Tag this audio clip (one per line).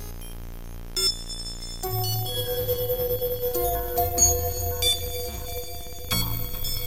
cinema
melody
glitch
idm
soundscape